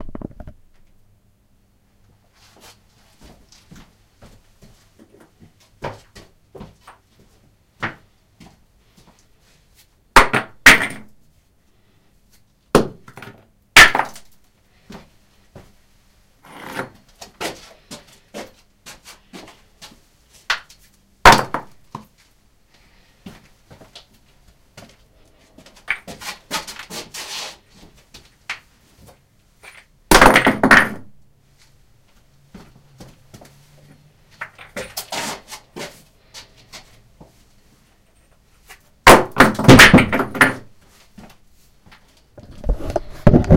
the sound of a rock/stone being thrown in a london house (in the garden I presume - can't quite remember)